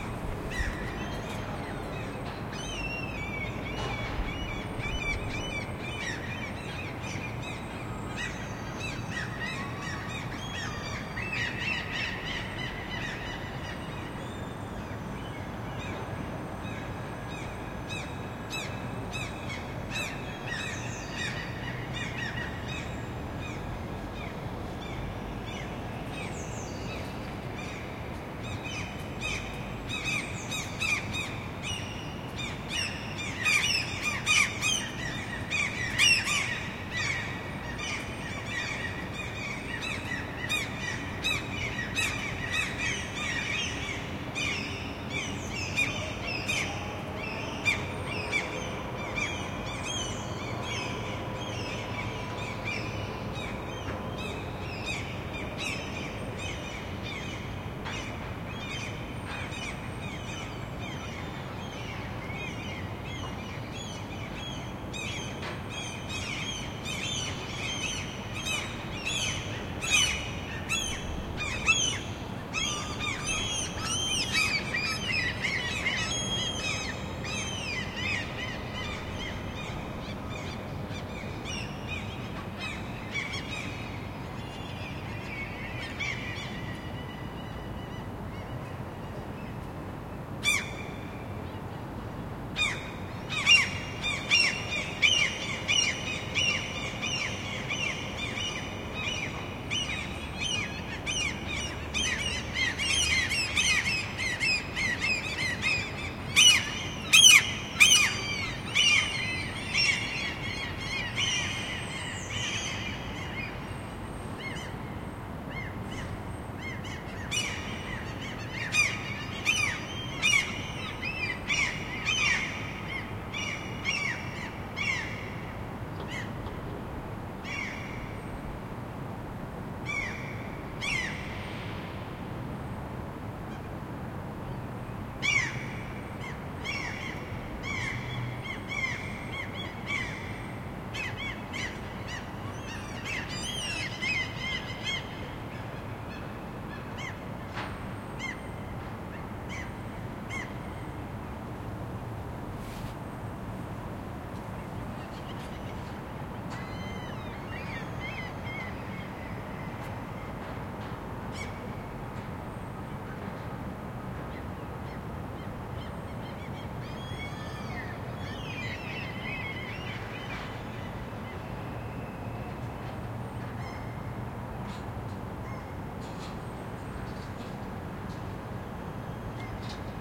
Small flock of seagulls, 10-15, flying round making noice. Aprox. 3-50 m from my Zoom H4N. Recorded in a city. City ambience and some from a nearby building site.
birdnoice, birds, field-recording, flock, seagull